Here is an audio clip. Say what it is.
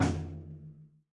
tom medmuff
a percussion sample from a recording session using Will Vinton's studio drum set.